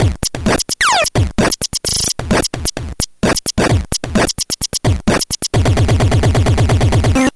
An agressive lo-fi beat constructed out of nothing else than the LSDJ wavetable channel.
drum, electronic, gameboy, loop, lsdj, nintendo, wavetable